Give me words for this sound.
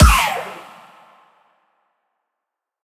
GASP Space Shot 1
Sound FX for SciFi style weapon or equipment action.